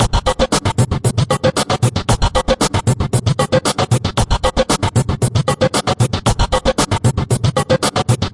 Created for the Haloweeen Dare Project.
115, bpm, break, breakbeat, buzz, gated, jeskola